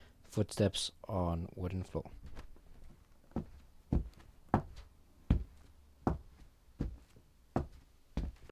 OWI Footsteps on wooden floor
footseps on wooden floor with leather soles
footsteps, hardwood, foot, walking, steps